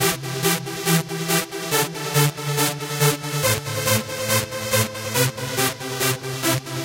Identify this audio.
trumpet delay loop
a Loop made with FL-Studio 6.Trumpet sound is a combination between 3 OSC and Buzz-Generator.Added 3 different types of Delay and re verb which generates a swelling delay.( this sample can be looped )further added slightly changing filter and a small flanged effect.